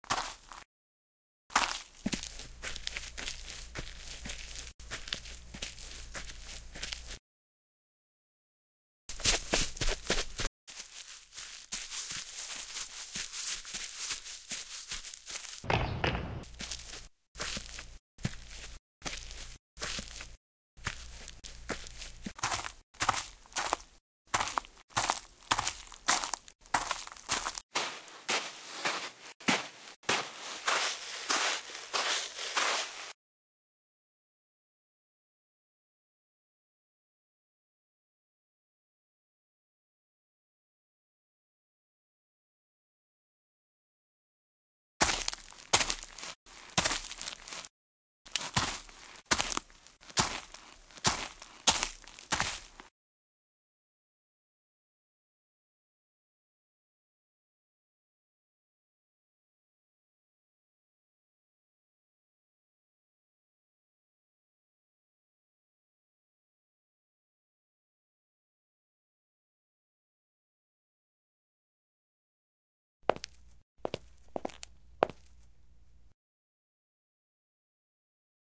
Walking foley performance 4
Extended foley performance, starts at for the movie "Dead Season."
dead-season, floor, foley, shoe, step, walk